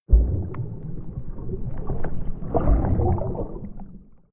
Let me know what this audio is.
Under Water Splash 6
A short out-take of a longer under water recording I made using a condom as a dry-suit for my Zoom H4n recorder.
Recorded while snorkeling in Aqaba, Jordan. There we're a lot of beautiful fish there but unfortunately they didn't make a lot of sounds.
sea, field-recording, under-water, ocean, bubbles